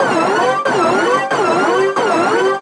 Tense alarm sound - different pitch and filter settings.
alarm, alert, emergency, klaxon, siren, sirens, synth, warning